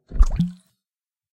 water bottle emerge
Pulling a water bottle out of water.
emerge, binaural, wet, bottle, water